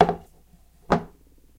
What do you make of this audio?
putting tray
dishes, dinner, put, lunch, kitchen, eating, plate, tray, table, putting